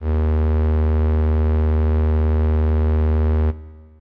FM Strings Ds2
An analog-esque strings ensemble sound. This is the note D sharp of octave 2. (Created with AudioSauna, as always.)
strings, pad, synth